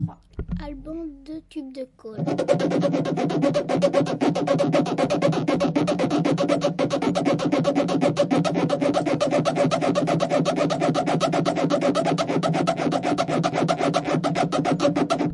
Alban-tubes de colle
France, mysounds, Saint-guinoux